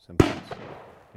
Distant rifle shot with reverb
Distant possible rifle shot with reverb.
firearm, shot, shotgun, shooting, shoot, hunting, field-recording, fire, firing, distant, bang, gun